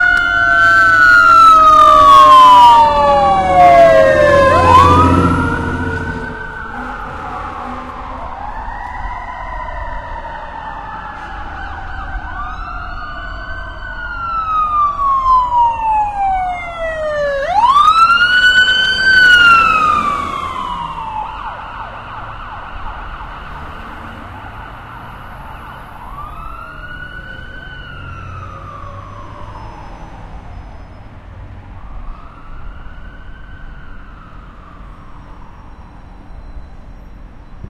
Has a VERY LOUD beginning, starts essentially in the middle of one loud siren. An ambulance and a firetruck flying by on a street one day, with other cars in the background.
ambulance, cop, emergency, firetruck, police, siren, sirens